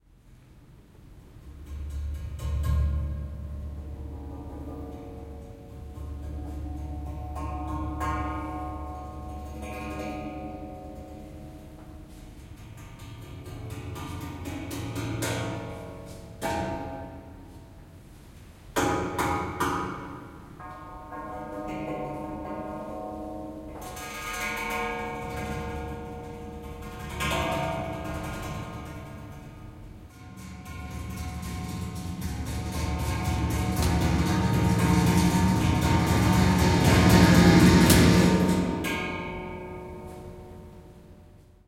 handrails sounds
Tapping and drumming on handrails in a corridor at UPF Communication Campus in Barcelona.
campus-upf, corridor, drumming, handrails, metal, rhythm, tanger-upf, tapping, UPF-CS14